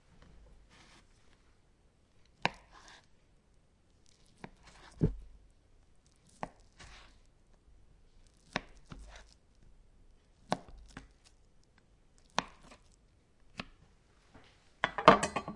I'm cutting Mozarella. Tasty!